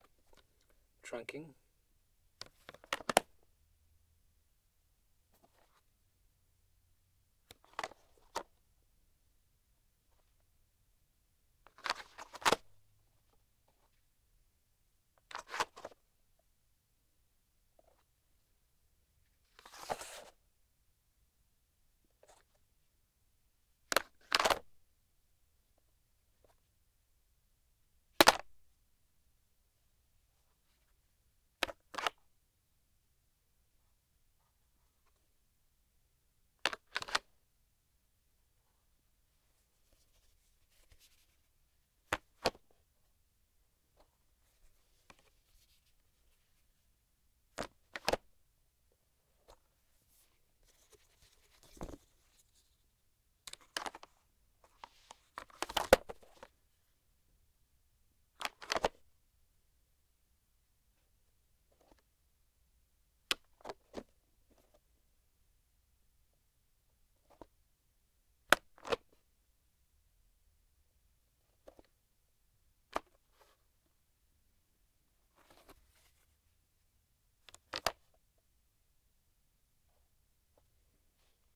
plastic trunking light
plastic trunking foley
foley, trunking